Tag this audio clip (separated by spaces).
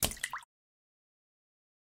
marine Run aquatic wave blop Slap bloop Lake Running Game Water Splash Dripping aqua River Drip pour crash pouring Movie Sea Wet